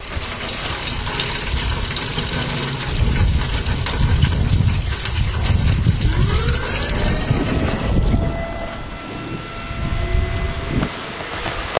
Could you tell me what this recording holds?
RAIN GUTTER AND TORNADO SIREN 4-2-2009
I WAS RECORDING RAIN COMING DOWN THE GUTTER DURING A THUNDERSTORM TODAY AND THE TORNADO SIRENS SUDDENLY WENT OFF. SORRY FOR THE ABRUPT ENDING, BUT I WORK AT A RADIO STATION IN THE STATES AND HAD TO GO IN AND BROADCAST THE WEATHER WARNING. RECORDED ON 4-2-2009 IN ARKANSAS.
siren, tornado, rain, gutter